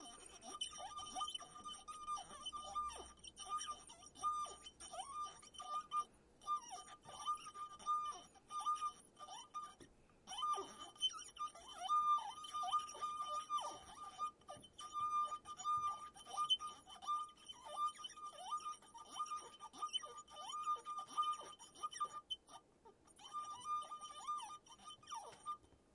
mySound Piramide Anas
Sounds from objects that are beloved to the participants pupils at the Piramide school, Ghent. The source of the sounds has to be guessed
BE-Piramide, mySound-Anas, wine-glass